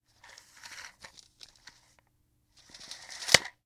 This is a tape measure being drawn out and back in.